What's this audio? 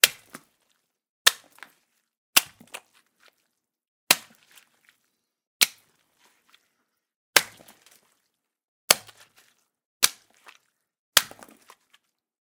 A series of slushy, splattery impacts made by punching watermelons. Great for fleshy, crunchy, disgusting moments!